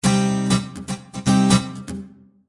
Rhythmguitar Cmin P106
Pure rhythmguitar acid-loop at 120 BPM